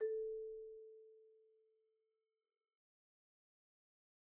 Sample Information:
Instrument: Marimba
Technique: Hit (Standard Mallets)
Dynamic: mf
Note: A4 (MIDI Note 69)
RR Nr.: 1
Mic Pos.: Main/Mids
Sampled hit of a marimba in a concert hall, using a stereo pair of Rode NT1-A's used as mid mics.